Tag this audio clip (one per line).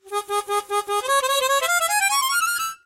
harmonica
chromatic